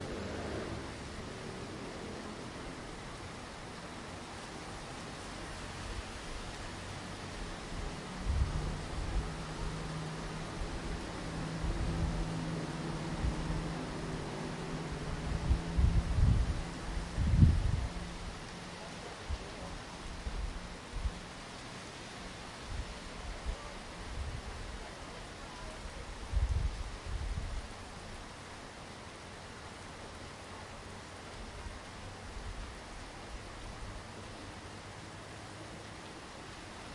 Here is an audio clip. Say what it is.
Zoom H1 Standing above road while raining getting traffic around Victory Monument Bangkok